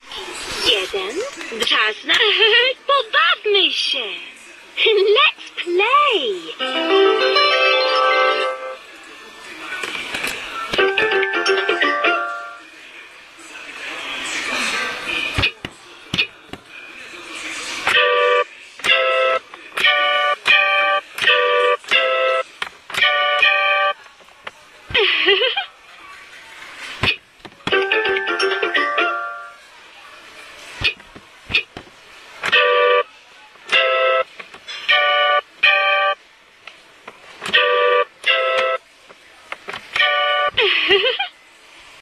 19.12.2010: about. 19.30. sound toy. M1 supermarket in Poznan.